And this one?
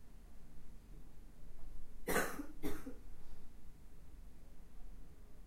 An ordinary cough, recording during illness.
sick, throat